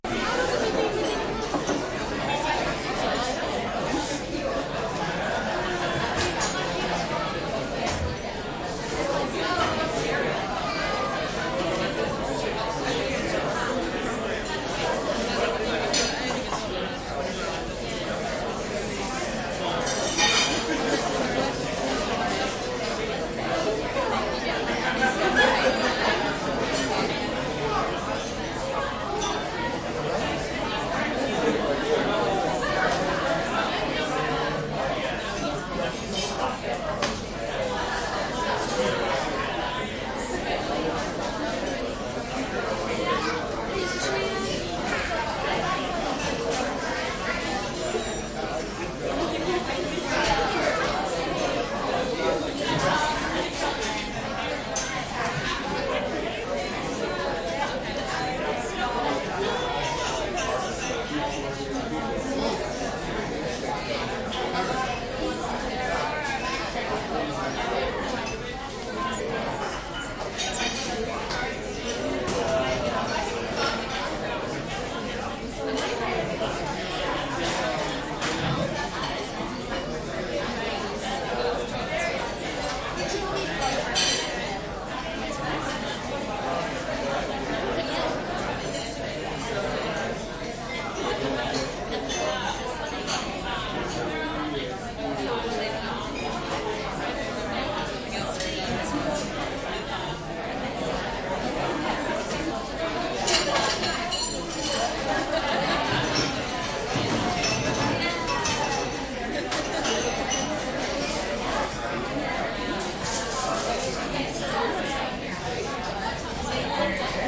crowd int busy diner NYC, USA
busy, crowd, diner, int, NYC, USA